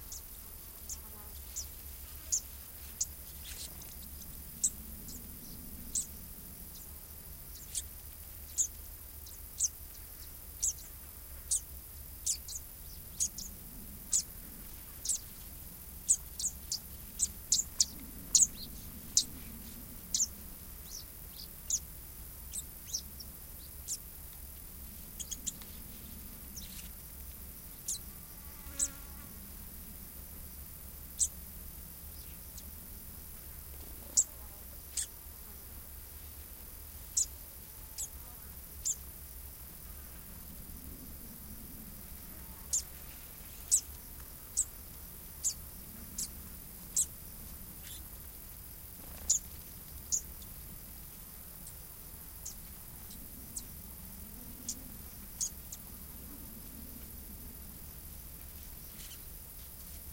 20080702.fantailed.warbler.02
Fan-tailed Warbler (Cisticola juncidis) singing and fluttering near the mics, some insects too. An airplane overheads. I fixed the mics to their favourite place (a fence) and waited. Under the hot and dry southern-Spanish summer they look very happy! Recorded in
tall grassland marsh (Doñana, S Spain) using a pair of Shure WL183 mics (with DIY windscreens), FEL preamp, and Edirol R09 recorder.
birds, buitron, fantail-warbler, field-recording, marshes, nature, summer, zit-zit